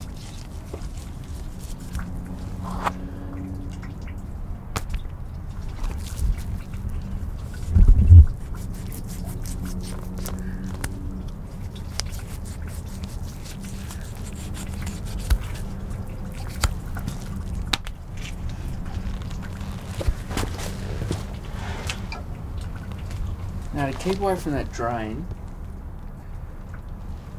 cut pig ear
cut, pig